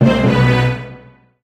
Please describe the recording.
Stereotypical drama sounds. THE classic two are Dramatic_1 and Dramatic_2 in this series.
dramatic, film, cinema, orchestral, movie, suspense, cinematic, tension, drama